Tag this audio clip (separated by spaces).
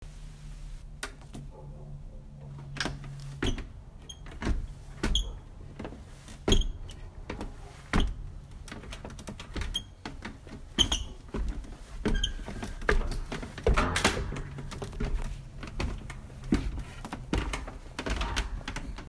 audio door attic footsteps